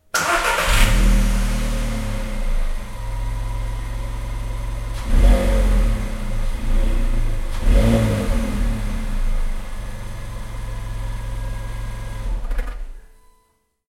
Starting the engine of my car.